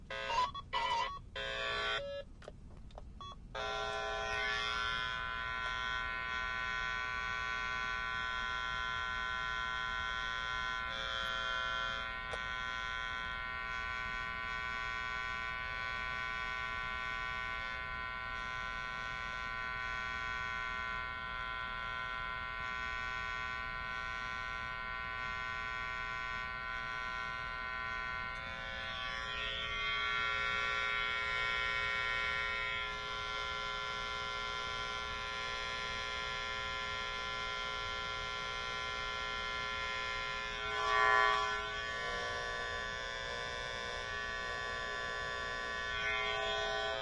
081017 01 electronic rf cable tester
rf cable tester sound
cable, effect, electronic, tester